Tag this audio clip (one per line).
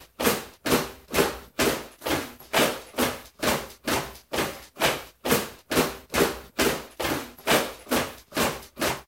foley
war
March